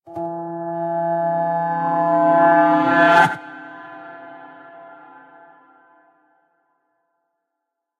Movie Short Swell
I made this sound on my way to college!
Really easy movie sound! made with 3 reverse instruments layered and processed!
More coming up!
boom, cinematic, movie, reverse, swell, title, trailer, transition